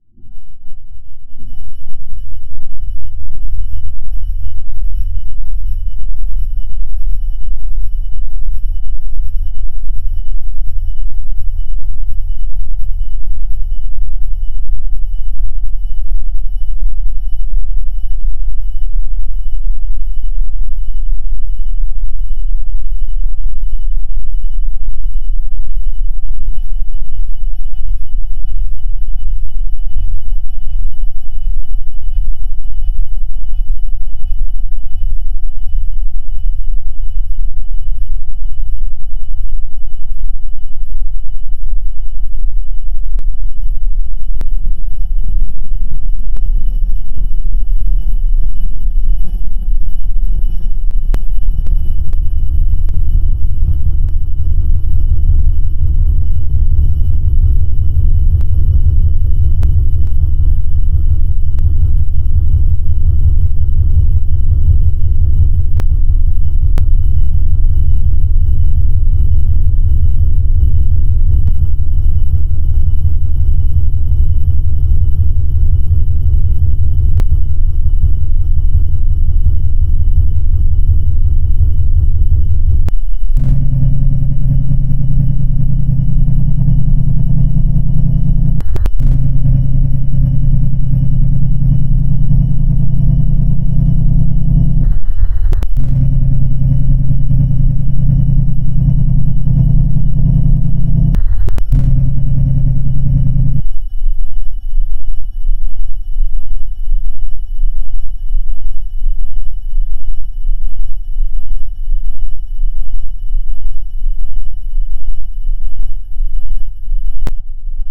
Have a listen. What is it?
Here we are again. In the machine room of Worlds largest container freighting, namely ' M/S Emma Maersk'. Ihave presented machine room Before and thought you had got enoigh of such sounds, but it seems as you never get enough! Alright then. In this clip you have come closer to the real machine room in the meaning that you don't hear the main Engine only, but also enormous diesel Engines for generating the many MWatts for generating electrical colour, Engines for the tap water and many other needs. You can't spend many minutes in Emma's gigantic machine room, you'll get tinnitus